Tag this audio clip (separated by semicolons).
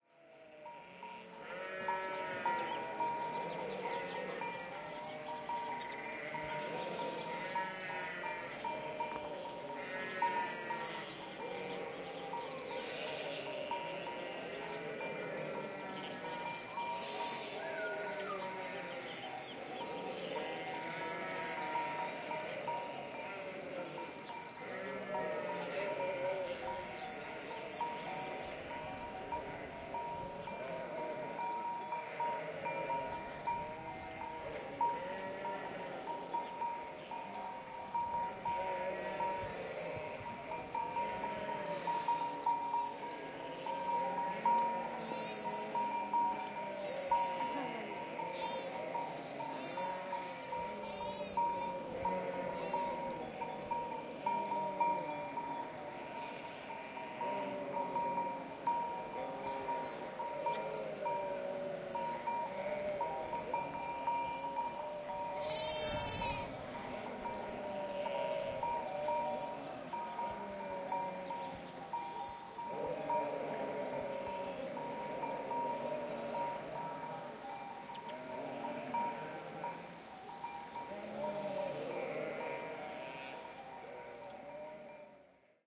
field-recording; nature; Sevilla; rebano; Alanis; chapel; bleating; naturaleza; balando